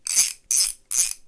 ratchet socket wrench tool
3 quick turns on a ratchet.
Recorded on a Zoom H5.
click, clicking, gear, ratcheting, socket, wrench